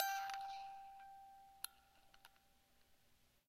4th In chromatic order.